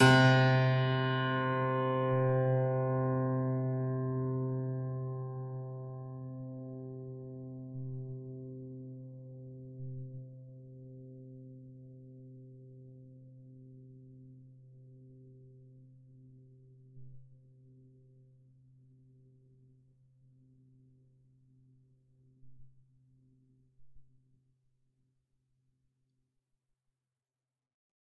Piano, Metal Mallet Strike, Sustained, C2
Raw audio created by striking a piano string pitched at C2 with a metal mallet while the sustain pedal is depressed, allowing the sound to decay naturally.
I've uploaded this as a free sample for you to use, but do please also check out the full library I created.
An example of how you might credit is by putting this in the description/credits:
The sound was recorded using a "H1 Zoom recorder" on 8th June 2017.